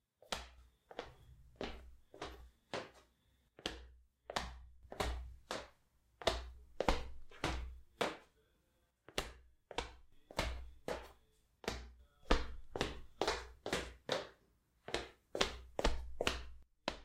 Recorded myself walking with slippers
Recorded in audacity (only effects are noise reduction for background noises and loudness normalization)
slippers, walk, walking